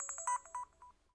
Camcorder Sony TG3 Beep Menu in
Electronic beep and shutter sounds from videocamera
beep, camera, electronic, mechanic, shutter